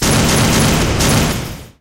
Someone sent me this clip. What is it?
This is the sound of a Robot after player kill in Sega game. This is an original sound. Free for anyone.
flash, game, robot, videogame